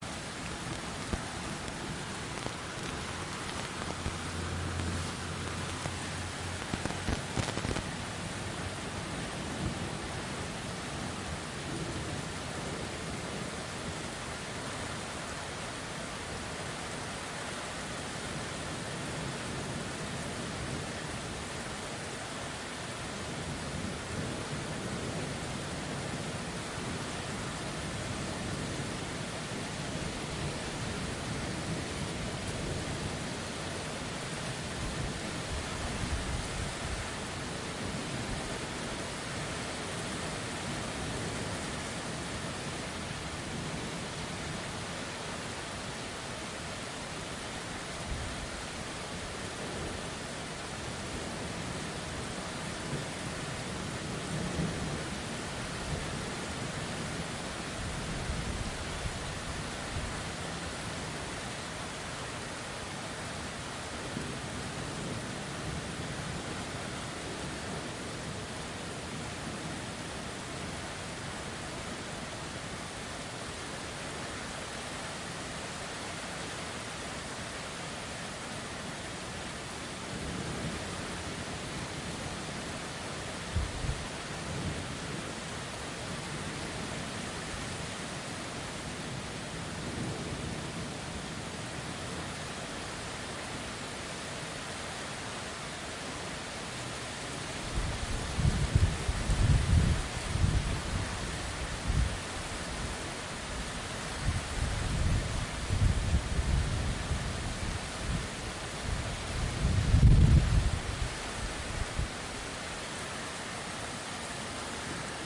Extremely heavy rain with distant thunder. Recorded from my window at home with H2N. No editing.